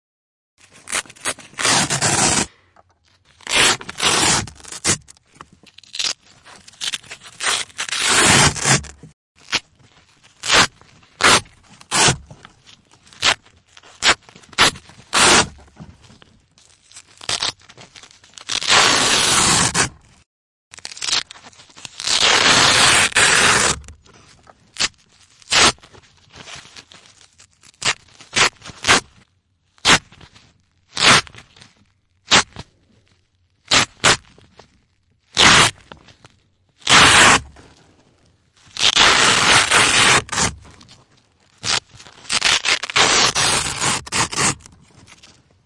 **Loud** Velcro pulling apart - slow, fast, intermittent. I used a 3 inch wide piece of velcro, so the sound has a lot of presence and depth.
Recorded with stereo NT1a mics in a soundbooth. No effects.
hook, ripping, loop, velcro, closure, rip, tearing, tear